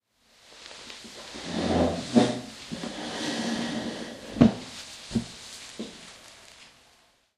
Getting up from the office chair
Chair, Furniture, Office